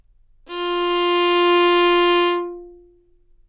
Part of the Good-sounds dataset of monophonic instrumental sounds.
instrument::violin
note::F
octave::4
midi note::53
good-sounds-id::3614
F4, good-sounds, multisample, neumann-U87, single-note, violin